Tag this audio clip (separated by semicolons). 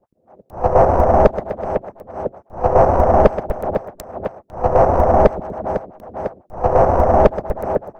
120bpm; electro; electronic; industrial; loop; rhythmic